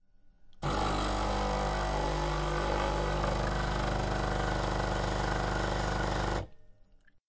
A coffee-maching makeing a coffee.
campus-upf, coffee-machine, coffee, UPF-CS13, expresso